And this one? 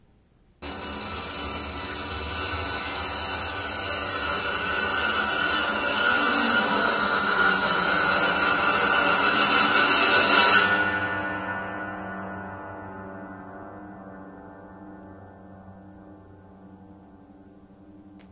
LONG FINGER SCRAPE 2 track recording of an old bare piano soundboard manipulated in various ways. Recordings made with 2 mxl 990 mics, one close to the strings and another about 8 feet back. These are stereo recordings but one channel is the near mic and the other is the far mic so some phase and panning adjustment may be necessary to get the best results. An RME Fireface was fed from the direct outs of a DNR recording console.
horror, effect, piano, sound-effect, acoustic, soundboard, industrial, fx, sound